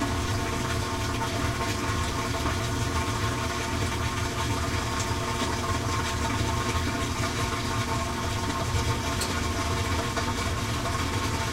washing machine rinse cycle 1
During the rinse cycle.